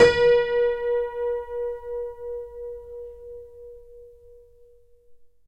Simple detuned piano sound recorded with Tascam DP008.
Son de piano détuné capté au fantastique Tascam DP008.
prepared, detuned